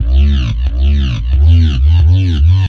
neuro bass
Created with ableton operator synth and some resampling.
neuro, neurobass, dubstep